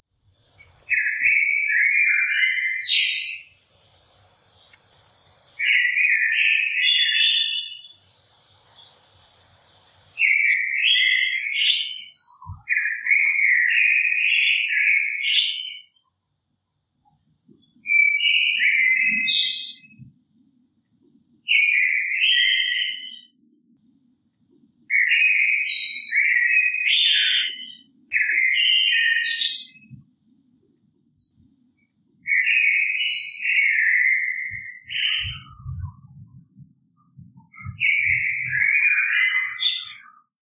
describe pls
one lonesome bird that lives in a tree on the neighbour's court. I recorded the tweets early in the morning from my balcony testing a rØde videomc pro. There was some far away plane noise that I've filtered down quite heavily, so that now the bird sounds kind of 'isolated' and maybe somehow synthetic. Additionaly I passed an EQ and a compressor (in Audacity).